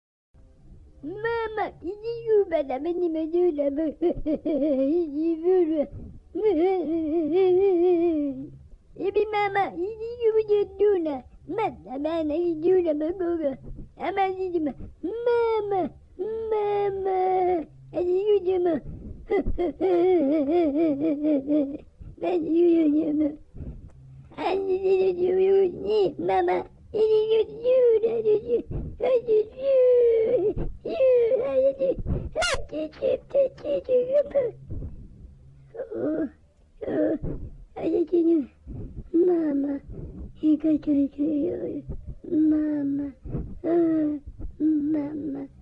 I've forgotten the small creatures who participated in the revolt against Death Planet. One of these dwarfs lost someone in the fight against the mega-Tyrannosauruslike armaments that walked on two legs. The little guy becomes comforted in mother's lap. The moderator wondered if I have created this sound my self. You bet I have. To do everything myself is my signum, I would never allow myself lowering to copy others. An interesting question though. What makes you wonder? This sound clip is so simple that a 7 year guy could do it. That is what I replied to the mighty moderator. All is DSP'd in NCH Wave pad.
crying, people, sad, small, Starwars